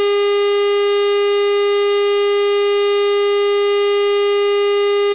nu tone
BT Number Unavailable Tone
number, tone, unavailable, bt